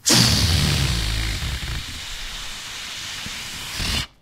Letting Air Out Of Balloon Short
Death of balloon. Act 2.
air, air-flow, away, balloon, balloon-flying, blow, blowing, empty, fly, flying, flying-away, zoom-h2